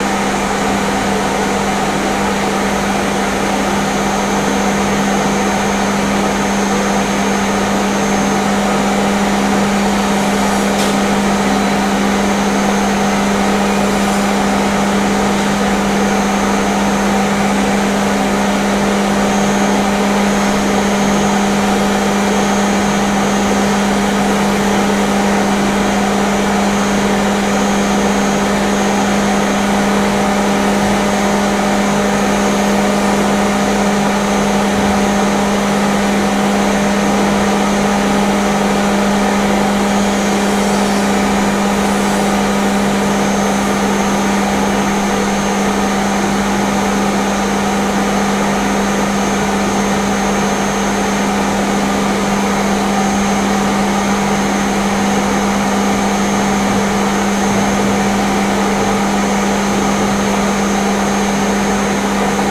20141023 Vacuuming and Construction in a house
cleaning, construction, vacuum